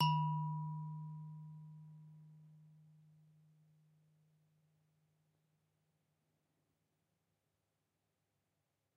I sampled a Kalimba with two RHØDE NT5 into an EDIROL UA-25. Actually Stereo, because i couldn't decide wich Mic I should use...
african, eb, kalimba, nature, note, pitch, short, sound, unprocessed